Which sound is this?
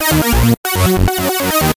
a bassy kind of loops i made in fruity
saw, loop, lead, trance